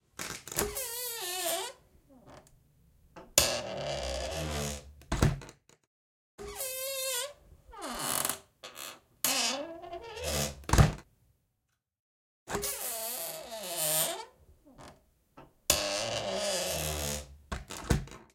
Door Creak
Stereo recording of an old armoire door's wood creaking. It was captured in an untreated location so it's a little bit roomy.
Zoom H6 with an XY capsule
door, close, wood-creak, old, wooden, rusty, open, spooky, squeak, wood, cupboard, creak, armoire